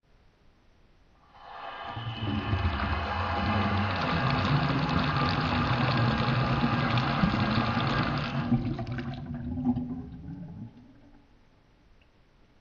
Mono recording of water falling from an opened tap into the sink. See the others in the sample pack for pitch-processed.
sink, pitched, slow, water, strange